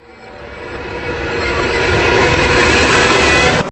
reverse fx 11
just some reverse